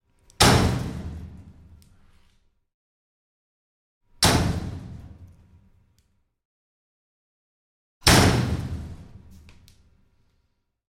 door metal locker or shed close hard slam rattle solid nice